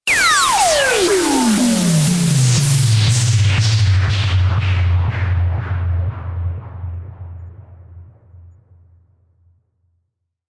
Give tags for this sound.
sound-design future soundeffect game-sfx glitch digital loop sfx noise

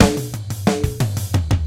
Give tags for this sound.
180bpm 4 5 acoustic drum jazz kit loop polyrhythm

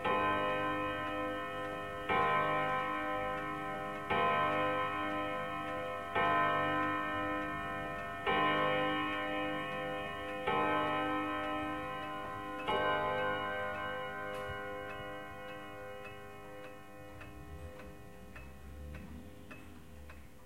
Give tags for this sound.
bell clock

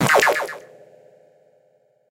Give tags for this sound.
gamesound indiedev sound-design indiegame sfx soundeffects

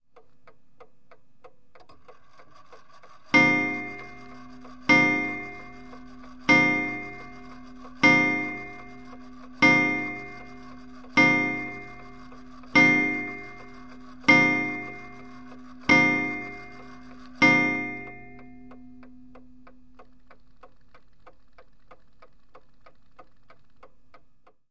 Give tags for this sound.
bell clock chime gong steampunk antique

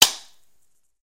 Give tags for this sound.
shot pistol gun Toy

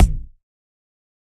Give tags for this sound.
layered dj electronic kit bd producer processed bass drums kick

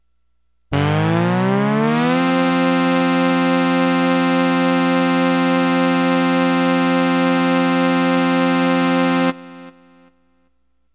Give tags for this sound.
keyboard analog

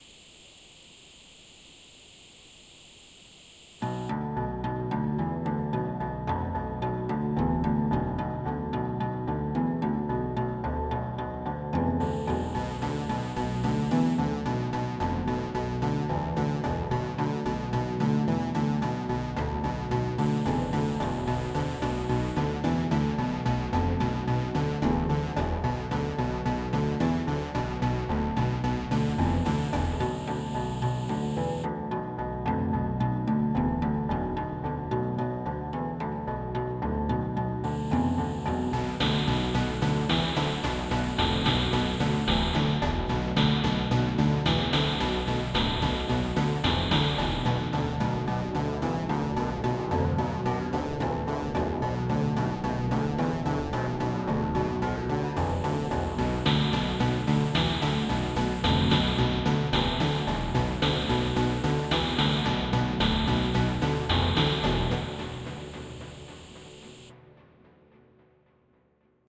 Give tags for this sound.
future radio sounds space star SUN wave

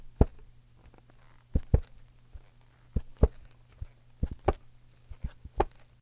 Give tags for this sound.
cover MTC500-M002-s14 notebook paper thick

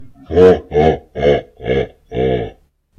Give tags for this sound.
laugh spectre creepy ghost terror scary horror bowser spooky fear evil

